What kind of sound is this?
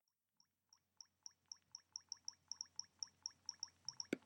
X" complexe
Son seul
tentative de variation du rythme
clavier, de, tlphone